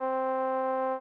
C4 trumpet synthesis